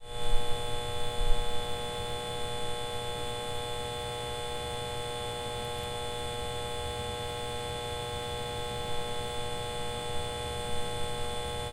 junction-box-2 trimmed normal
Trimmed and normalized using Audacity.
buzz, electric, field-recording, hum, junction-box, machine